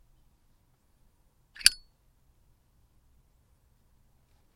A zippo is opened
opening, open, zippo